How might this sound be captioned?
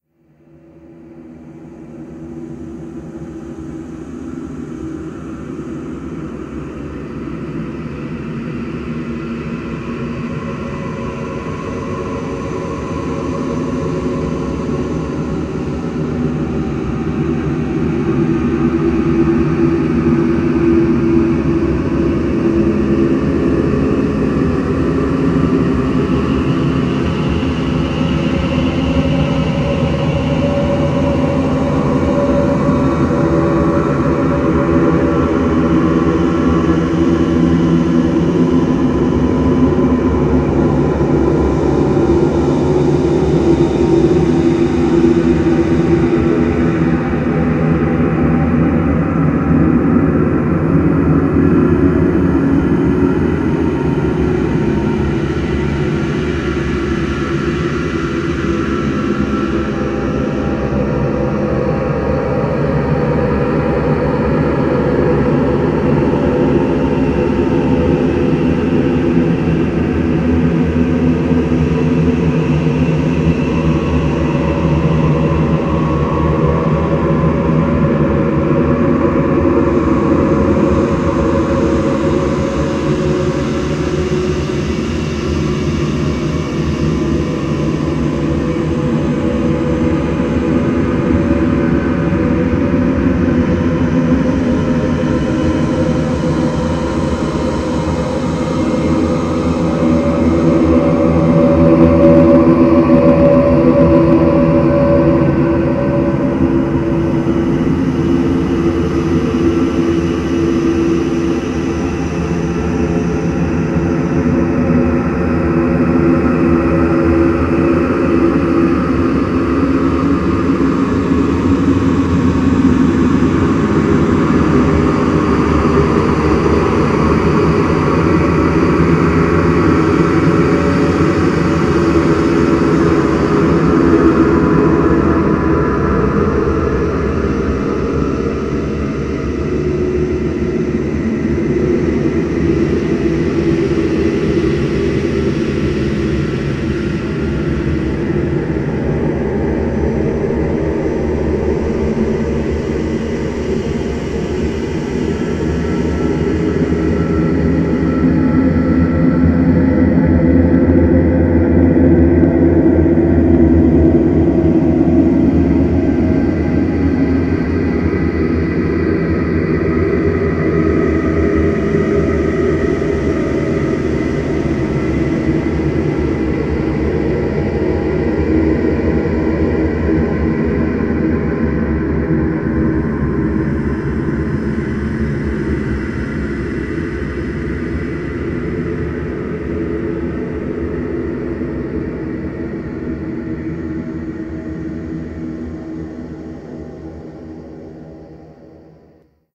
Day 2 2nd July klankbeeld Horror Voice Ambience
Day 2. Deep horror ambience sound created with a deep voice sample. I took this sample by klankbeeld and applied the following effects to it in Audacity:
*tempo change- compressed into 30 seconds
*paulstretch
*pitch change
*reverb
There's some unremovable clipping in a segment of this sound, though with a bit of skill it cud be edited and removed.
This is a part of the 50 users, 50 days series I am running until 19th August- read all about it here.
50-users-50-days, air, ambiance, ambience, ambient, anxious, atmosphere, creepy, dark, deep, drone, evil, grow, horror, landscape, reverb, scary, sinister, spooky, suspense, terrifying, thrill, voice